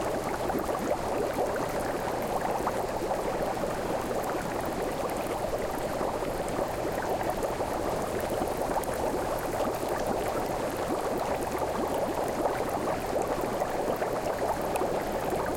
[remix] Hot Tub Loop
A loopable hot tub sound effect in stereo.
Remixed from:
jacuzzi, water